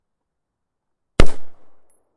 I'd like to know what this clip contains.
The sound of an M16A1, which is an AR-15 variant chambered in 556